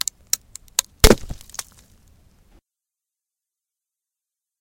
wood breaking
recording of breaking a dry wooden branch close to the microphone